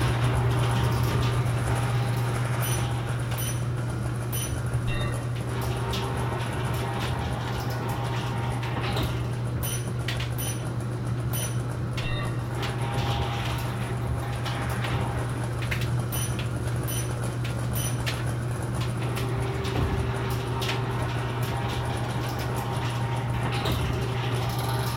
The sound of machines working in a factory environment. You can hear the engine of a machine, a conveyor belt running and a stamping tool in operation on the conveyor machine.